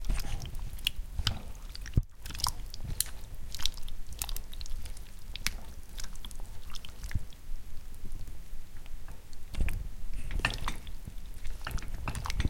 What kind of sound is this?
organic, blurpy, sticky, kitchen, alien, mud, skin